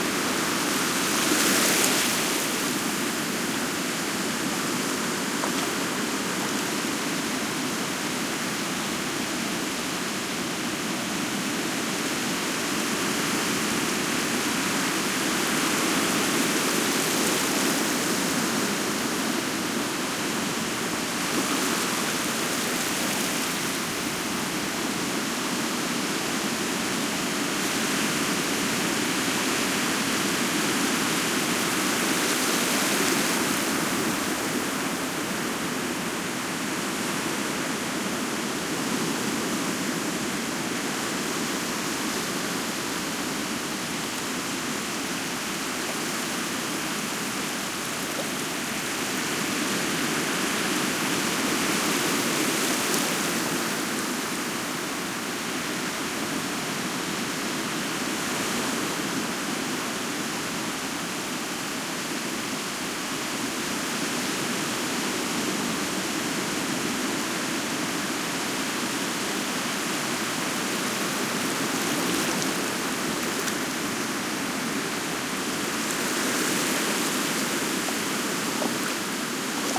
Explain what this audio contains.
Waves splashing, equalized. Recorded near Vilareal de Santo Antonio, Algarve, Portugal. Primo EM172 capsules inside widscreens, FEL Microphone Amplifier BMA2, PCM-M10 recorder